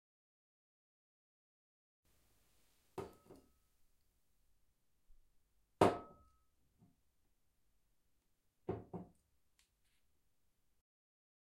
Putting a bottle of wine on the counter
Putting a full bottle of wine on a kitchen counter, varying degrees of gentleness.
counter, wine, kitchen, bottle, worktop